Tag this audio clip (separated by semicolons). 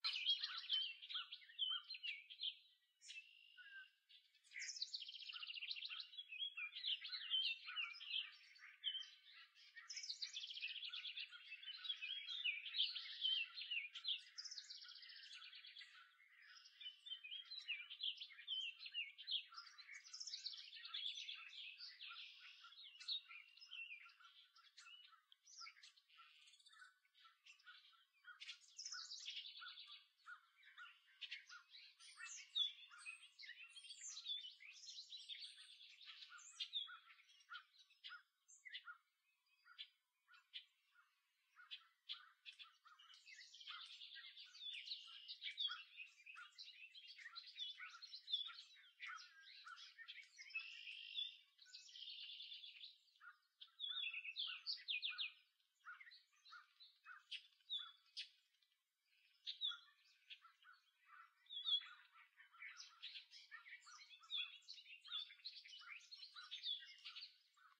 clean; field-recording; nature; song